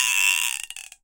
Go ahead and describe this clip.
Indonesian instrument spinning from fast to slow. It was recorded in a studio with a matched pair of Newman KM 184 set up in an A-B pattern and in a reflection filter.
indonesia, stereo, close